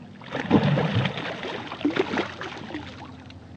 Fish Splashing Release 2
Splashing sounds of a fish being released back into the water
fish, fish-release, fish-return, splash, water